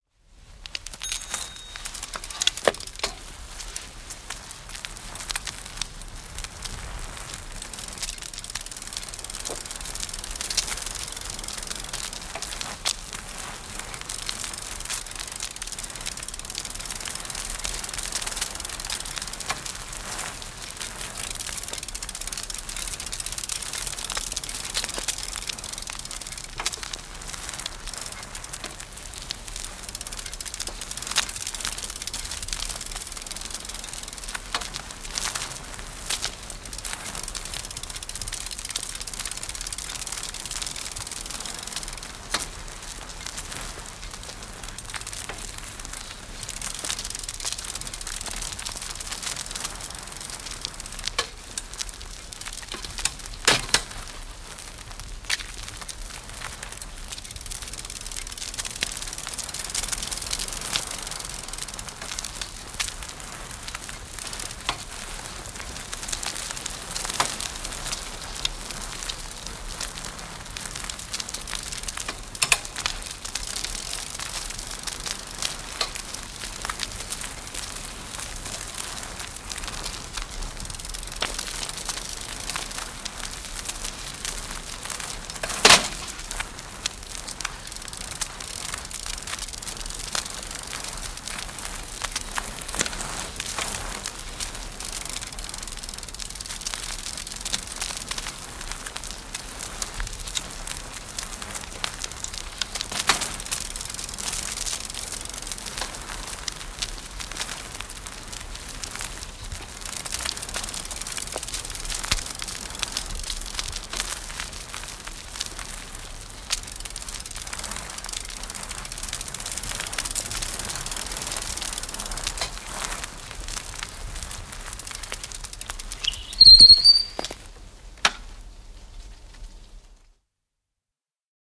Polkupyörä, ajoa asfaltilla / A 3-speed bicycle, driving on asphalt, ticking, clicks, brakes squeak at the end, stop
Vaihdepyörä, 3-vaihteinen. Lähtö ja ajoa mukana asfalttitiellä, vaihde raksuttaa, loksahduksia, lopussa jarrutus.
Paikka/Place: Suomi / Finland / Vihti
Aika/Date: 10.10.1984